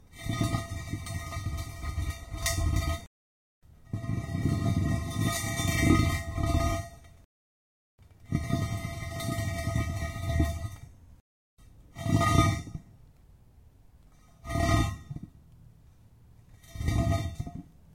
Pipe Drag 01
Heavy steel pipe dragged along the concrete.
Rode M3 > Marantz PMD661.
drag, dragging, grit, gritty, heavy, sarcophagus, scrape, slide, sliding, tomb